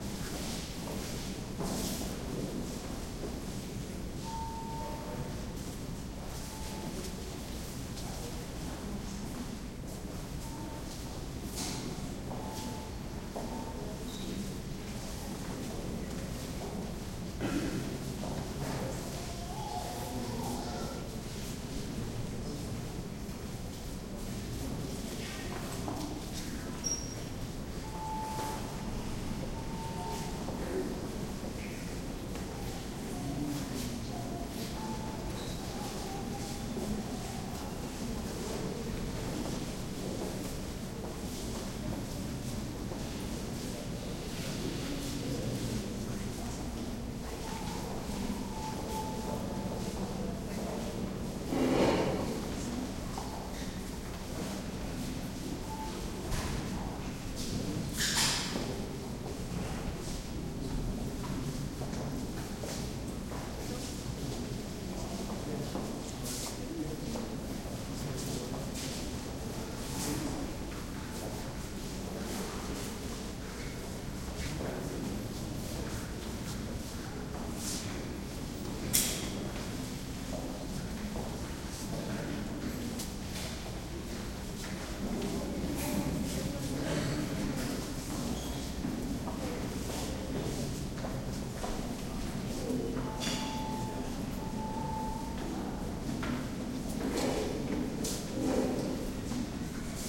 crowd int medium gallery steps echo wash murmur from above2 no babies Montreal, Canada
Canada, murmur, crowd, int, wash, medium, echo, steps, gallery, Montreal